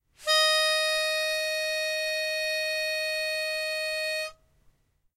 Guitar Pitch Pipe, E-flat4
Raw audio of a single note from a guitar pitch pipe. Some of the notes have been re-pitched in order to complete a full 2 octaves of samples.
An example of how you might credit is by putting this in the description/credits:
The sound was recorded using a "H1 Zoom V2 recorder" on 17th September 2016.